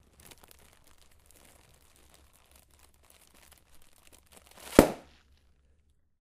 crumble-bang
A small plastic bag with air under pressure being crumbled and until explodes